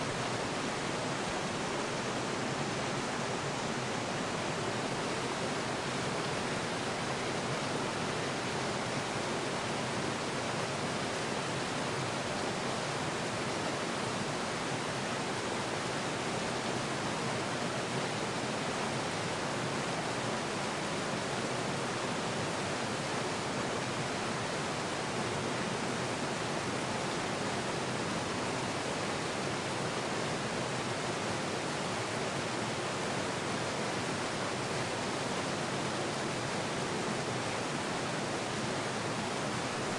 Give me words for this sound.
A recording of the upper falls as the water flows over the edge.